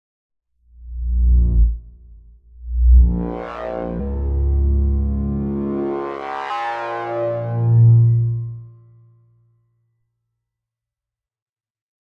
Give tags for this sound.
bass
wobble

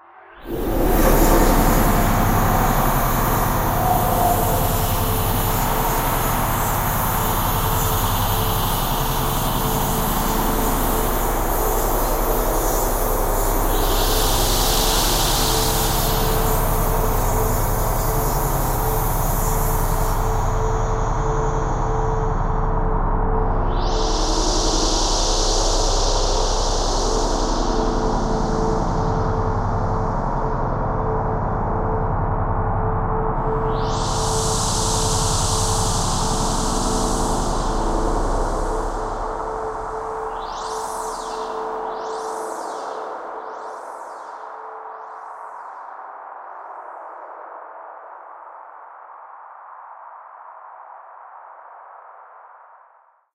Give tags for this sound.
artificial
drone
soundscape

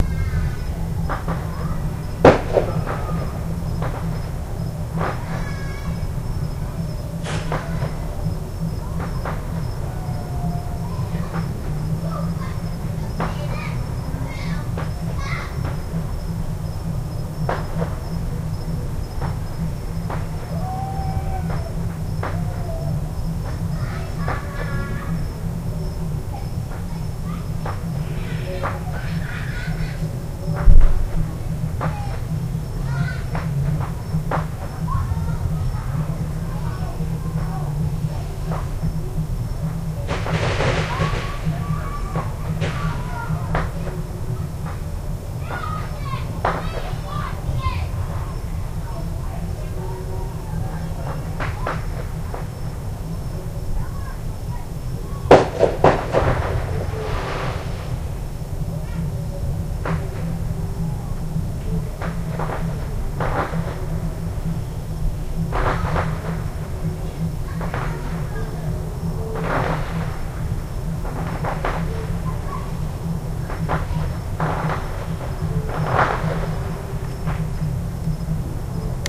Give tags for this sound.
fireworks
new

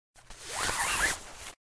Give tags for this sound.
Luggage
People-movement
Case
cloth
computer
fabric
Movement
Foley
Bag
Laptop

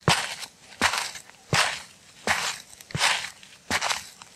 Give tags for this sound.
floor
footsteps
walks
ground
steps
walking

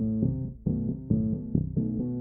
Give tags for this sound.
FX
Slice
Synth

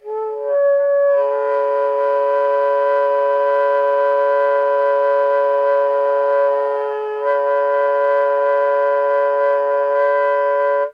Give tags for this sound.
multiphonics
sax
saxophone
soprano-sax

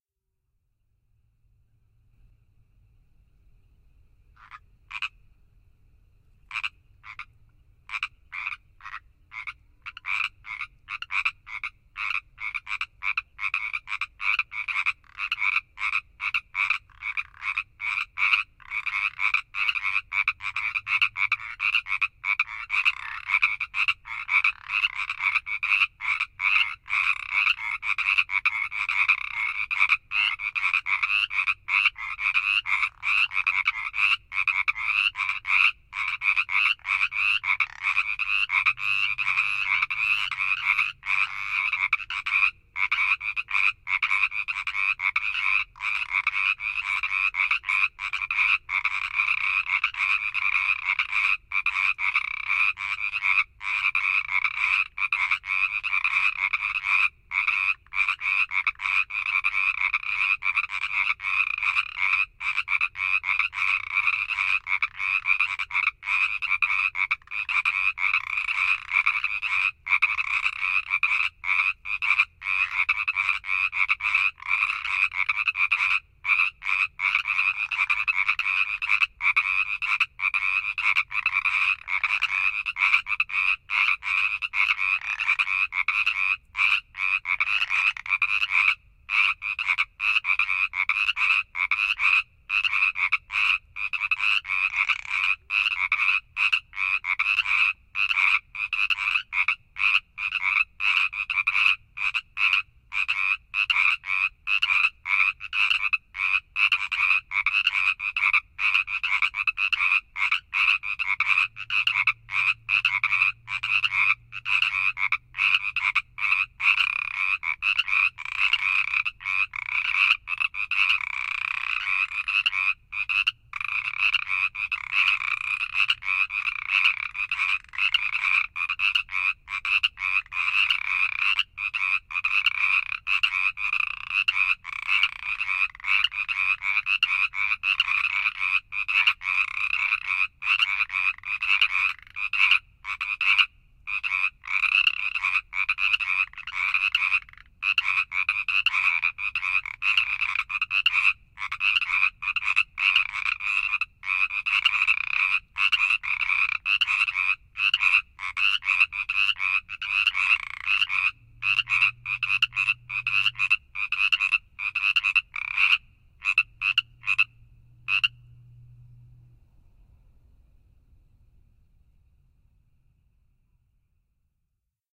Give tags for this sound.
sherman-island
california
frogs

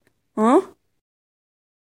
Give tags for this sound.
ahhh
confusi

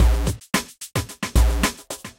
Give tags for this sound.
Beat
Idrum
Misc

